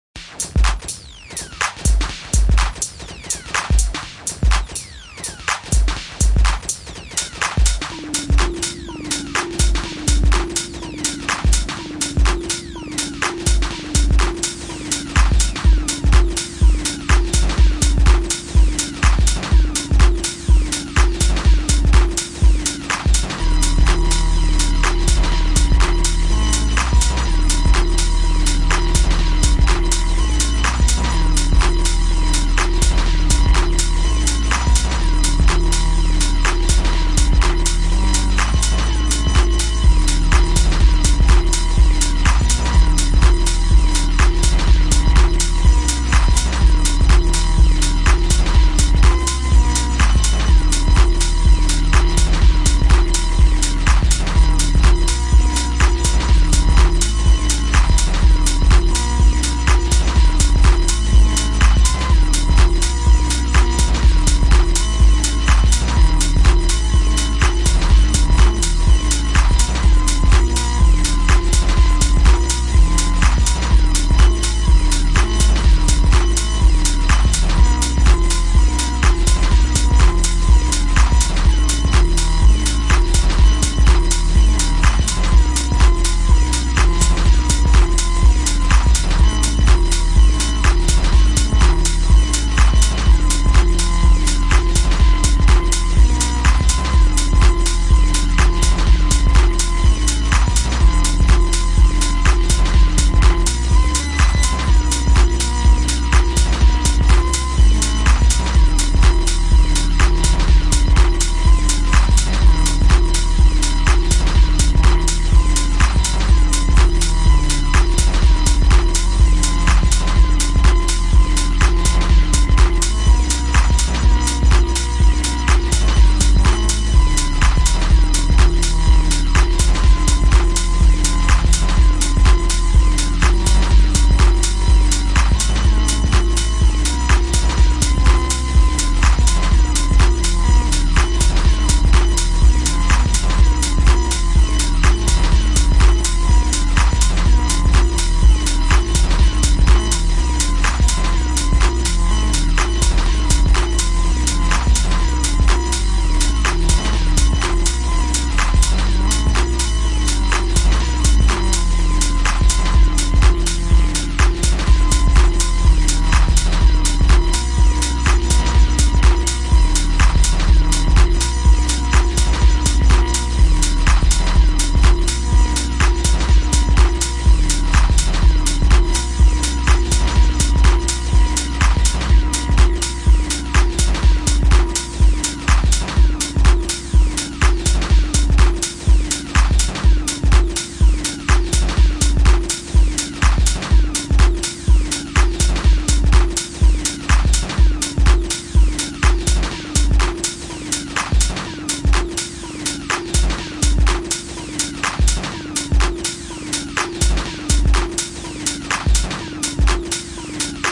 "Chemical Fireworks" Free roam tech house multi pattern
Just a looping beat patter made in FL Studio 11 while playing with Elektrostudio Model Mini. Pattern includes intro and outro + it has a multiple variations within, suitable for cutting, and editing into a beat.
It is not a full beat, rather several ideas played freely.
Good source for a begginer who is learning about making a beats.
moog, rasping, techno, bounce, electro, chemical, lead, house, fireworks, glitch, rave, acid, club